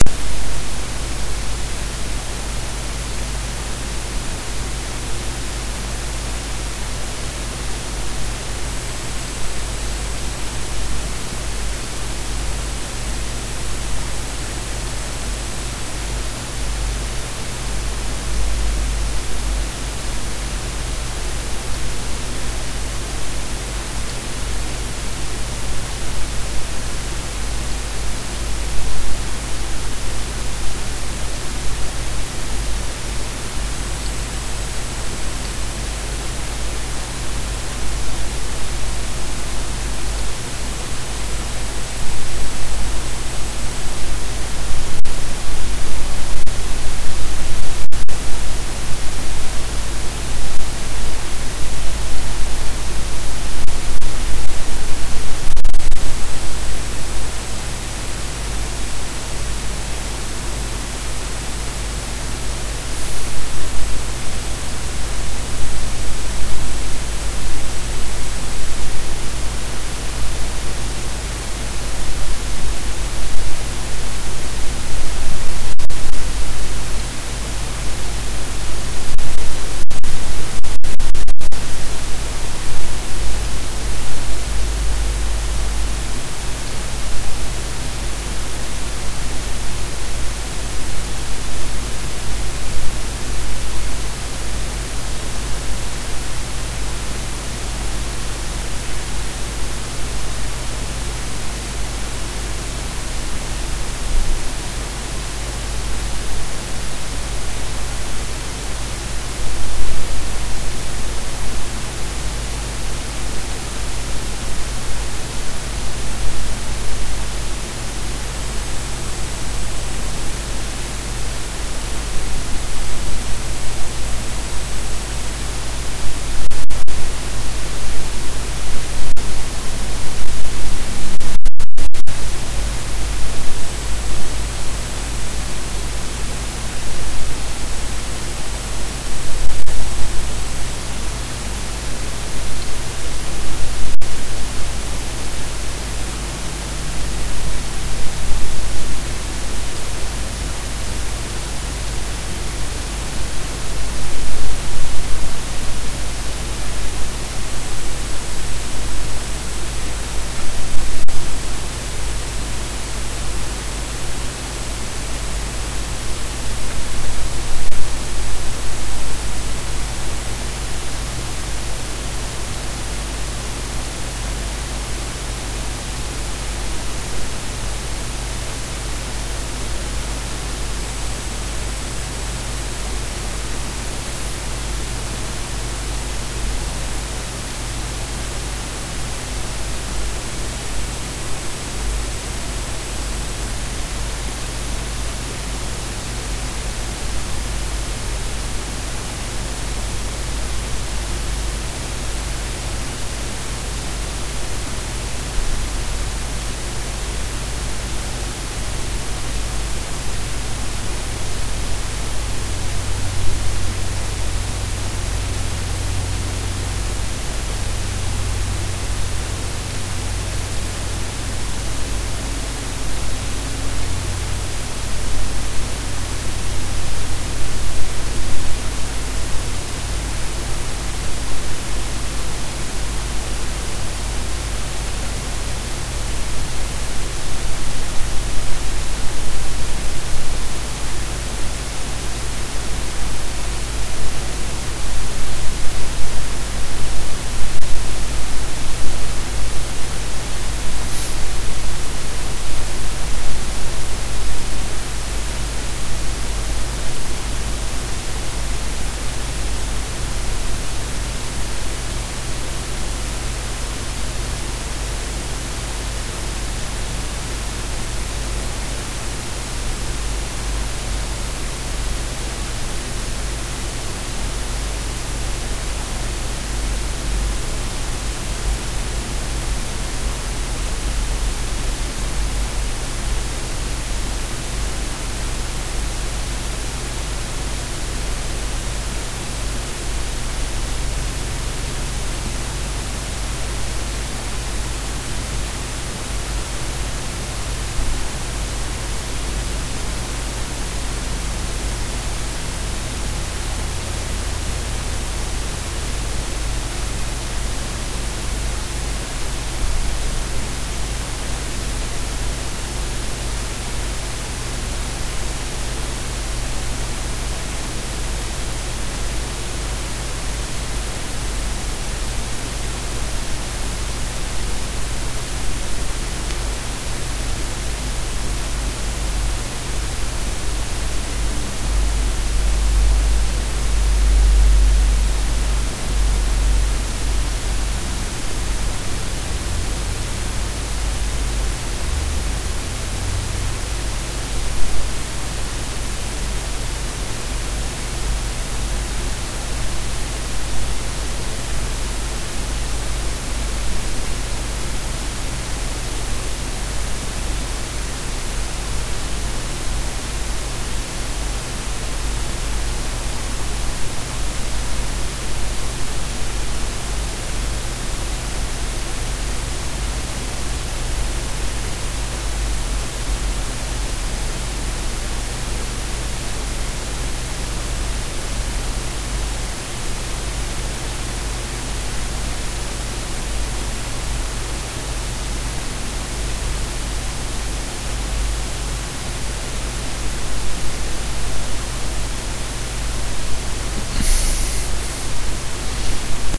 ECU-(A-XX)110+
Efficiency, Unit, 0G, Model, Fraser, Calculator, UTV, Rheology, Standard, Battery, Power, QoS, ECU, Iso, Control, Analogic, Rack, Jitter, Structure, Lens, Wave, Shelf, ATV, Synchronous, Carrier, Differential, Beam, Engine, Stream